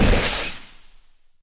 Small rocket launch sound effect created for my game project. You can use it on rocket launcher turrets or whatever you want.